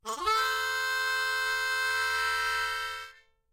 Harmonica Fast Rip Bend 02
I recorded a sample of a harmonica rift.